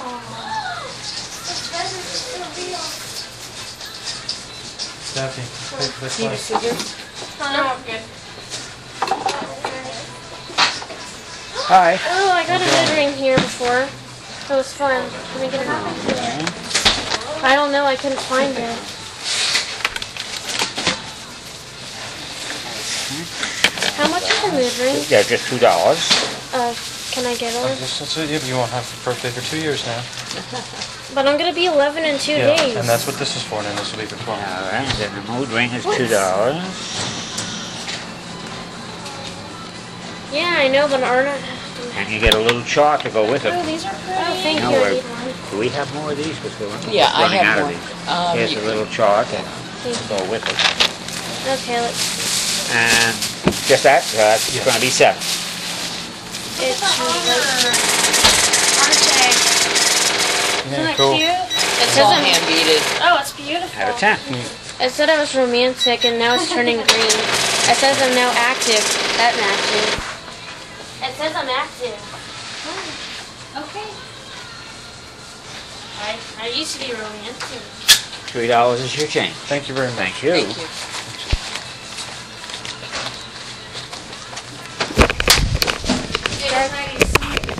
Buying a mood ring and some clay in the gift store recorded at Busch Wildlife Sanctuary with Olympus DS-40.
ambient, cash, field-recording, nature, register, store